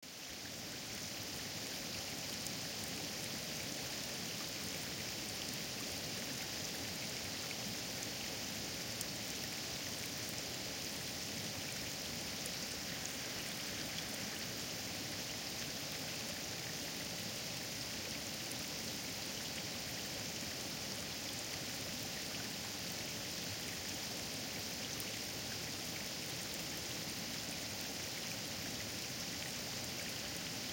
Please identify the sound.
Mountain water flowing, stream, creek.
creek, flow, mountain, river, stream